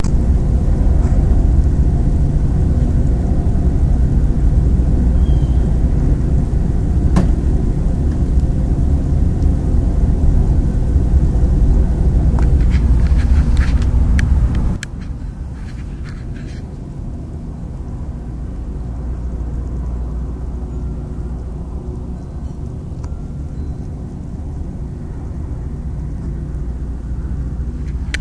SonyECMDS70PWS tadpoles2
test, field-recording, digital, tadpoles, microphone, electet